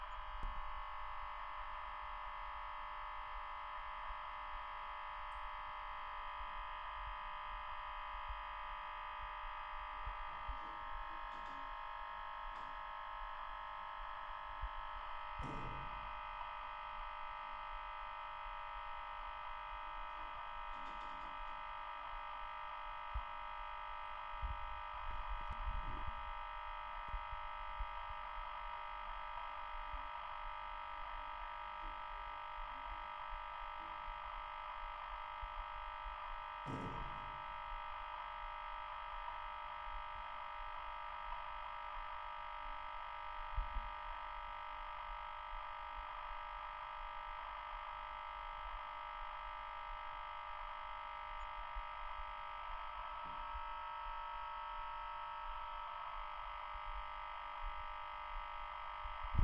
Sound from motor. Record use Zoom H2, 2017 summer.